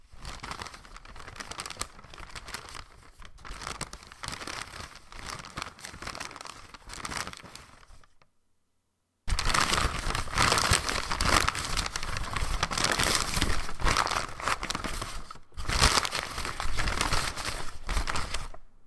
paperbag crumbling
from a McDonalds bag
bag, brumbling, crumble, crushing, paper, paper-bag